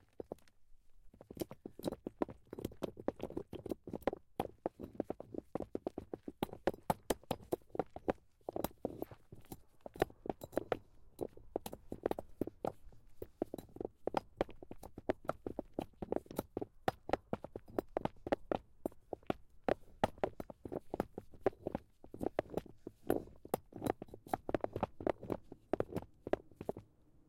SFX Stone Calcit DeadSea movement rocking #6-189
rocking stone on stone
heavy, large, move, rock, stone